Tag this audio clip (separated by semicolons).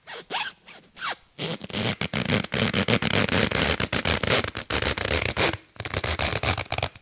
creepy; shoe